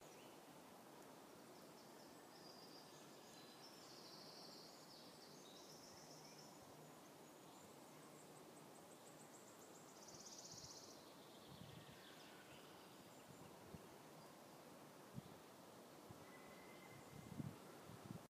Forest in spring 2
Forest in springtime, birds singing
Frühling im Wald 2